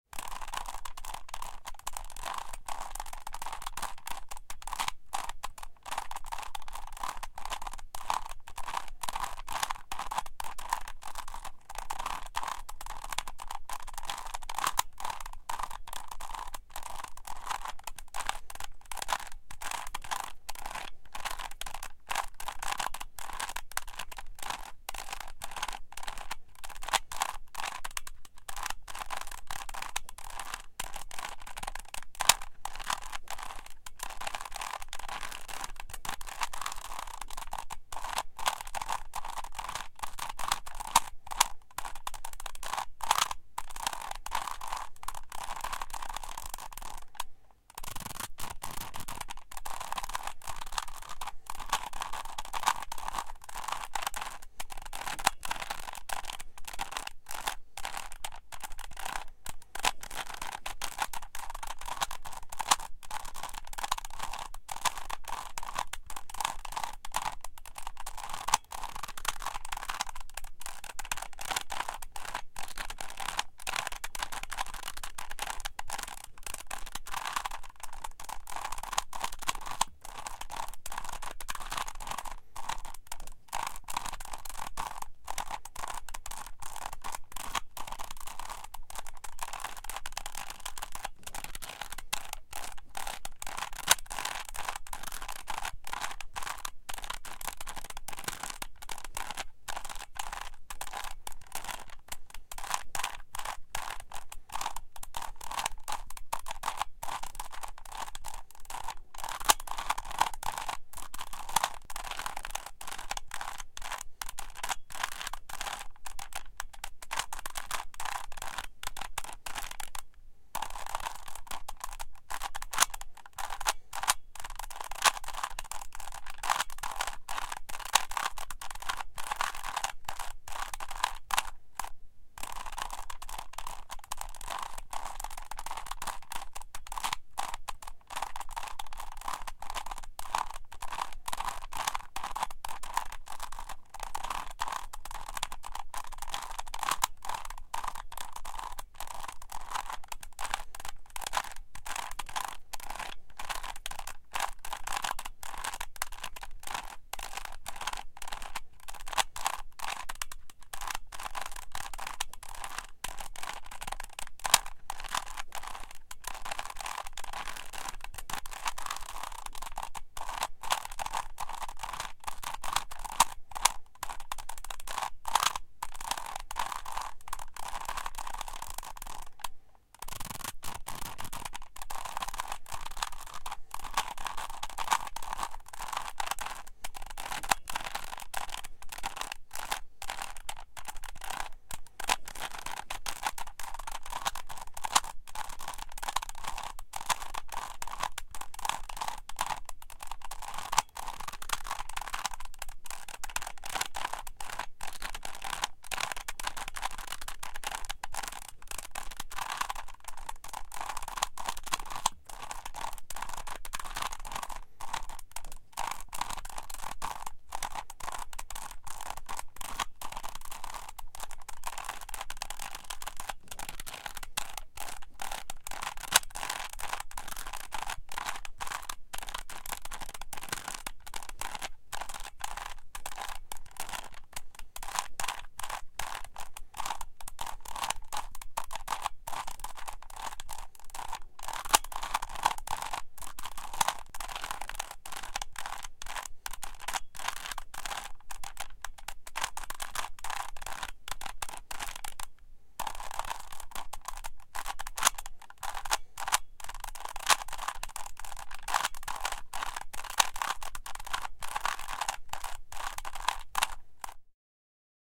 Rubik's Cube 3x3. Recorded with Behringer C4 and Focusrite Scarlett 2i2.